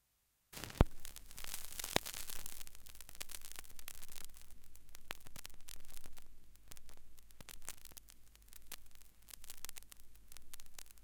Noisy LP Start #2
The sound of a needle hitting the surface of a vinyl record.